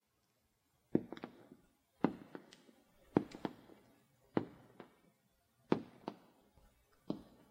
SLOW BOOT STEPS 1
for a scene where someone is being followed